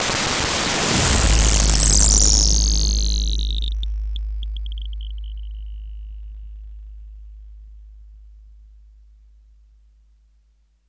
nord glitch 013
A noisy that breaks up into a big bass sound. Created with FM feedback using a Nord Modular synth.
glitch, fm, bass, fade, noise, loud, digital, nord